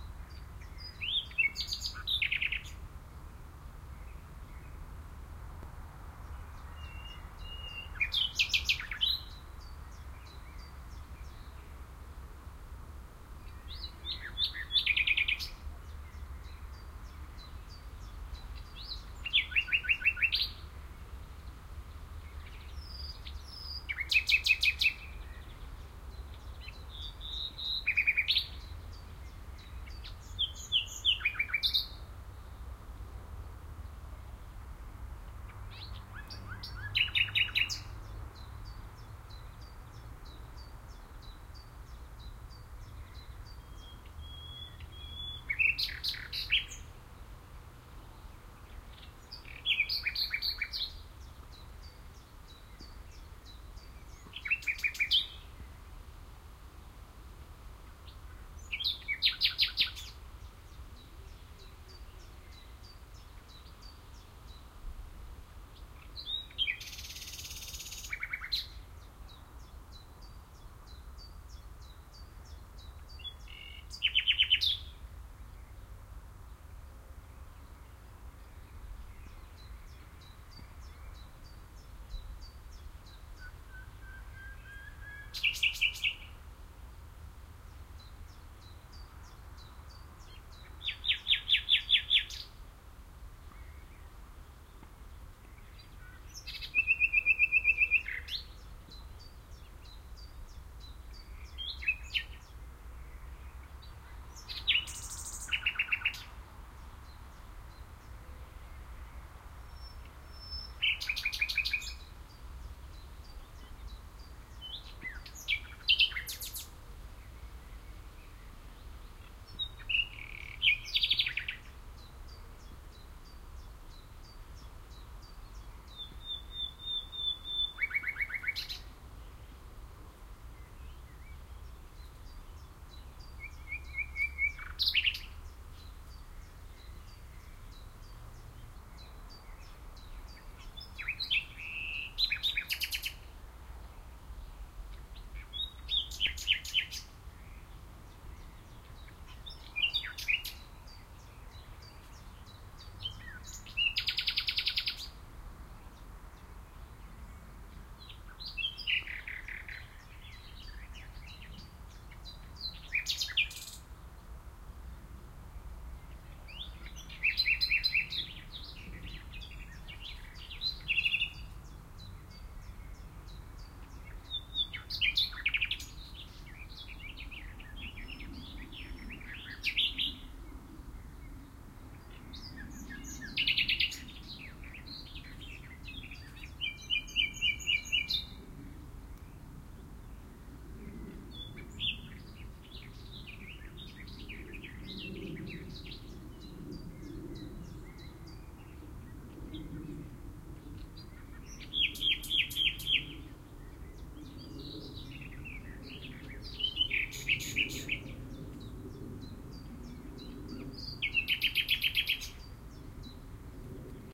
Nightingale song 2
This track was recorded at the end of May 2008 in the middle of the day near the "Park der Sinne", the "Park of the senses", which seems pretty appropriate !! What a beautiful song! Is this nightingale telling us something?
OKM II microphones with A3 adapter into iriver ihp-120.
binaural, birdsong, csalogany, etelansatakieli, field-recording, fulemule, luscinia-megarhynchos, nachtigall, nature, nightingale, rossignol, rossignol-philomele, rossinyol, rouxinol, ruisenor-comun, slowik-rdzawy, sornattergal, spring, sydlig-nattergal, sydnaktergal, usignolo